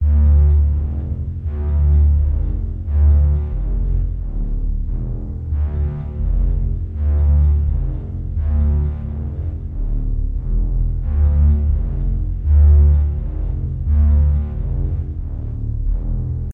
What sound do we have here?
Devious - Theme
A little theme track as a part of my "Themes" projects, this time inspired by "Outcast" a show which was boring, yet entertaining, yet cancelled. RIP.
paranormal, Eerie, halloween, Video-Game, demon, Scary, orchestra, violins, ghost, haunted, Ambient, horror, Atmosphere, thriller, Creepy, evil, sinister, doom, fear, Environment, Violent, nightmare, dark, Spooky, rising